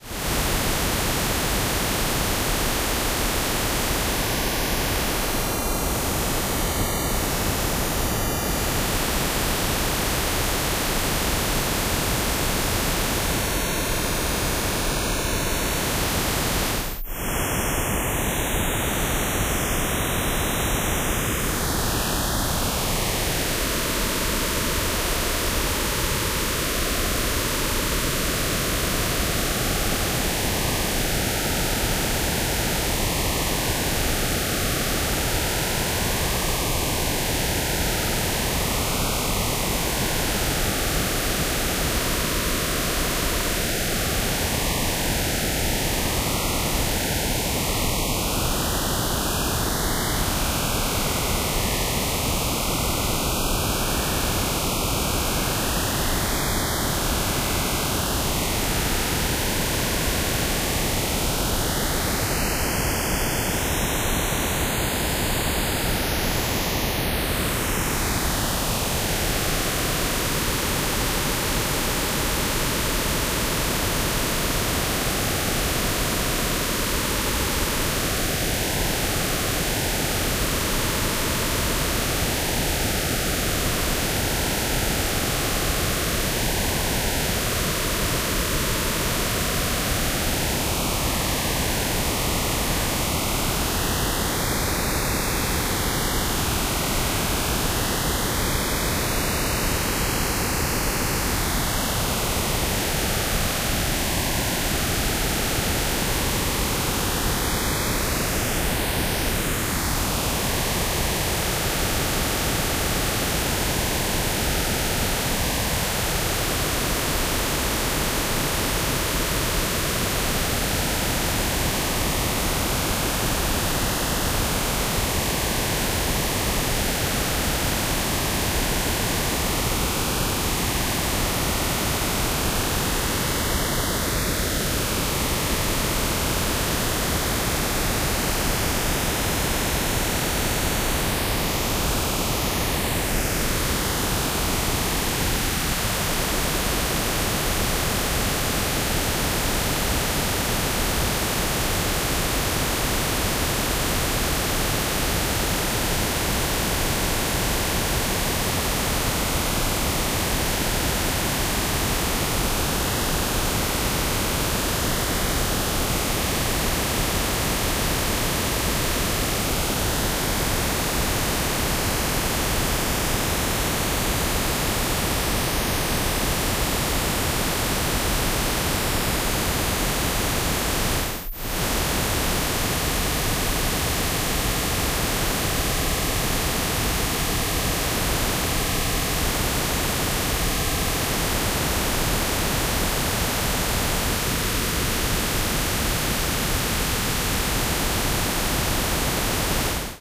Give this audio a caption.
co2ppmnoise
CO2 ppm chart translated space noises made with either coagula or the other freeware image synth I have.